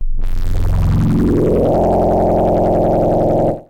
FM Ambient Noise
Ambient sound made with Korg Volca FM